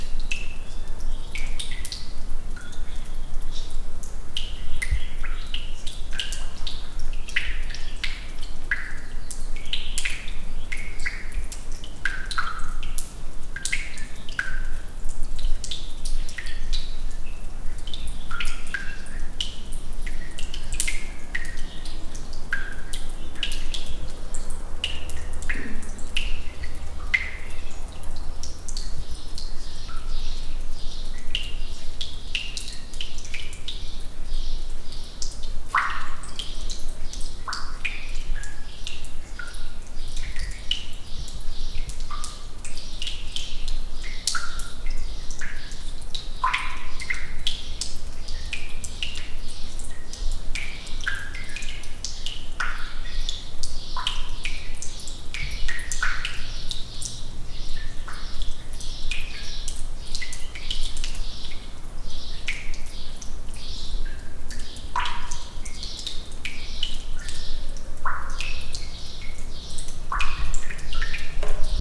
Dripping B18h30m06s08apr2013
Mono ambient of water drops recorded in an unfinished, abandoned house in spain. The room had raw concrete walls and was kind of big, hence the natural reverb. Recorded with an Sennheise ME66 Directional Mic on a Fostex FR2-LE.
Doing so, the noise should be at an acceptable level.
water, drops, building